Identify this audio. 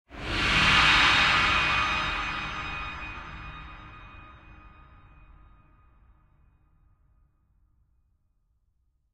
Horror Cinema 6 2014
I suppose one could call this an impact sound. It's not too abrupt, but given the right atmosphere I'm sure it can be used as one.
Created with an EMX-1, Prism, Absynth 5, and processed through Alchemy.
Spooky, Atmosphere, Ambient, Impact, Creepy, Cinematic, Tense, Horror, Sound-Design, Film